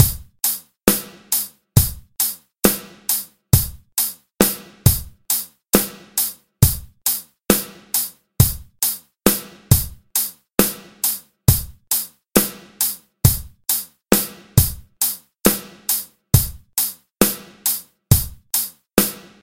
A drum pattern in 11/8 time. Decided to make an entire pack up.